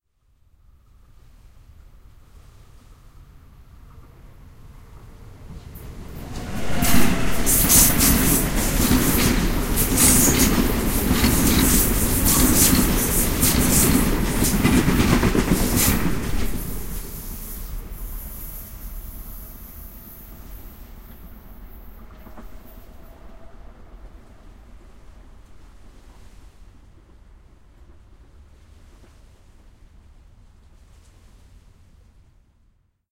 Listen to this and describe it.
Train LeftToRight Shoreline
A passenger train passes from left to right at a high speed. The sound of water lapping against a nearby river shore can be heard slightly.